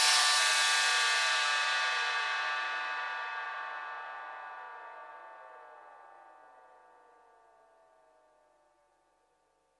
Evolver cymbal 1
metallic, smith, hihat, evolver, closed, dave, dsi